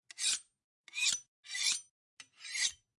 Sword Dagger Shing Slice Scrape
Dagger, Medieval, Draws, Shing, Scrape, Draw, Slice, Sword, Swords